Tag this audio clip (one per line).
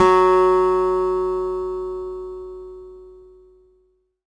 acoustic
guitar
multisample